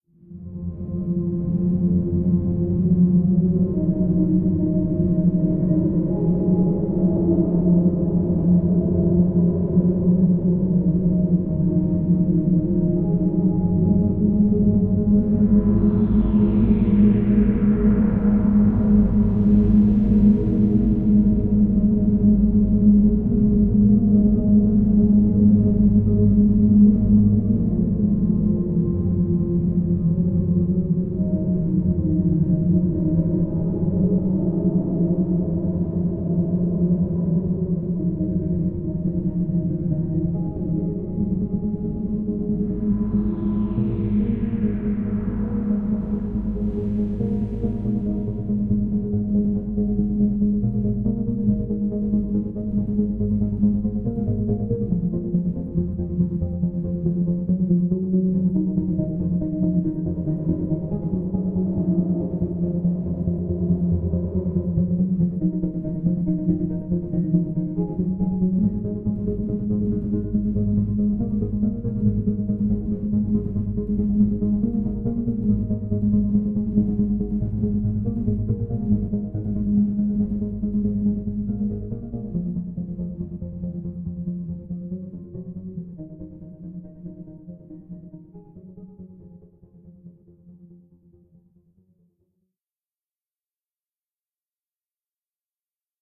resonating very far away
This is a background sound usable for things like a video featuring space and the universe. The production is based in synthesizer melodies, but it's been washed out enough to make it more of a sound effect, instead of a song you can listen to.